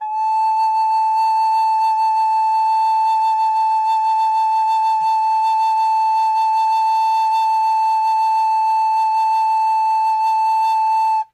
Alto Recorder A5
Quick sampling of a plastic alto recorder with vibrato. Enjoy!
Recorded with 2x Rhode NT-1A's in a dry space up close.
woodwind, plastic-recorder